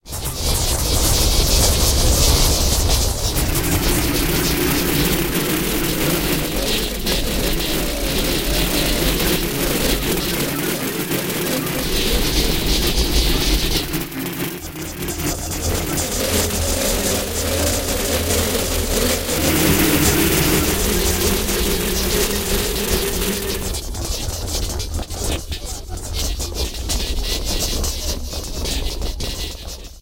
Rapid jumble of voices. Made with Blip1 and processed.
garble, gabble, voices